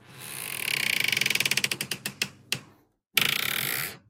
creaking door
creak, door, squeak, wood